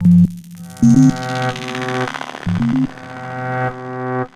this loop could be used for fills as it doesn't really suggests any melody. made using various softsynths.